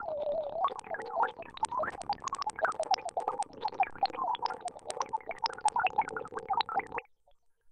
Granulized Mallet Hits
Using modules through Analog Heat.
fx, granular, unprocessed, modular, analog, blip, synthesis, blop, eurorack